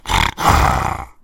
tiger roar
a recording of me mimicking a tiger by using an empty toilet roll
tiger, animal, roar